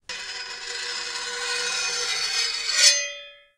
metal scrape03
Metal, Sharp, Sword